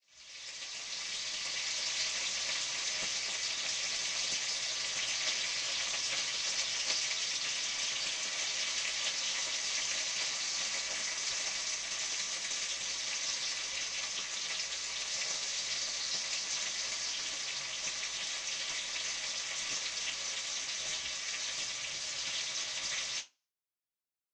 Locker room shower pan left
edited a clip of a dee frier into the sound of a shower in a locker room, panned off to the left
locker-room, edited, foli